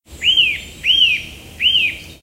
Nuthatch tells the whole forest who's boss. Nuthatch Singing A most beautiful song